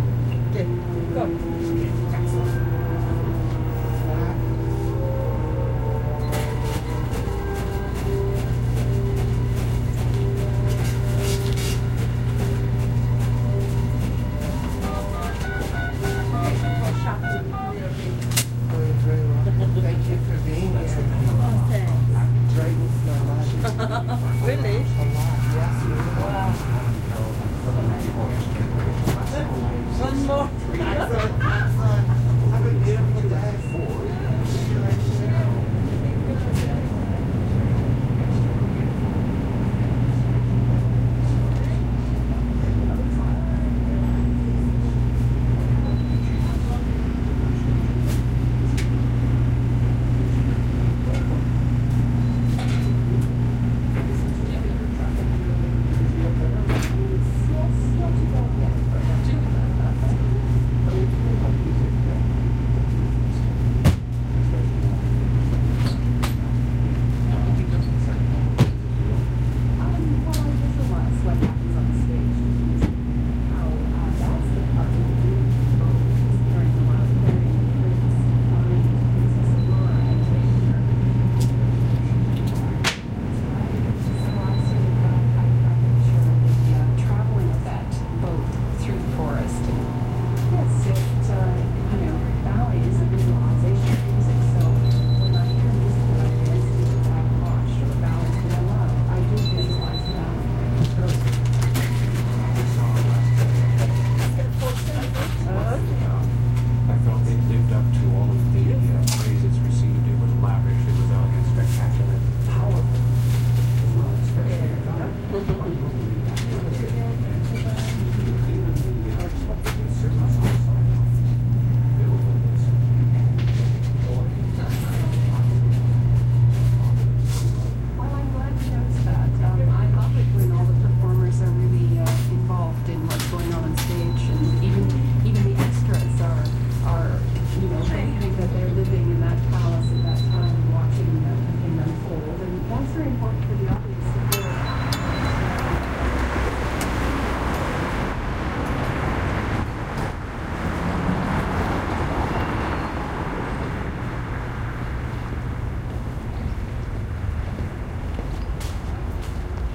Convienience Store:Freezer
convienience, freezer, store